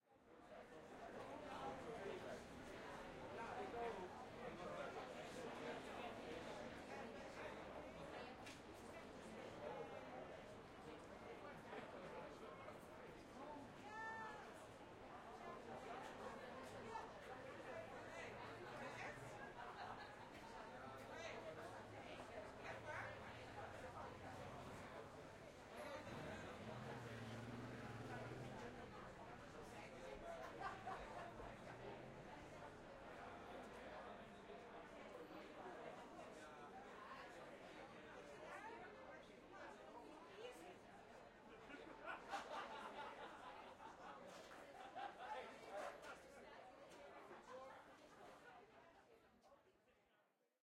Amsterdam Atmos - Crowd - Artists having a party outside @ Koninklijke Schouwburg, Med. Wide perspective
After a theatre play, around 20 actors chat in dutch. Lively, happy. Recorded outside in a half open space. This one recorded from a fixed medium wide perspective. See other for different perspective.
chat, crowd, ambience, people, dutch, atmosphere